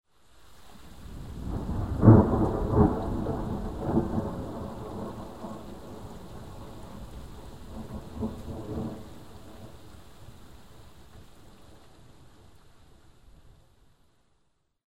14May2008DistantThunder03
This thunder sound was recorded on 14th of May, 2008, in Pécel,
just the neighbour city of the capital of Hungary, Budapest. It was
recorded by MP3 player.
field-recording, lightning, storm, thunder, thunderstorm, weather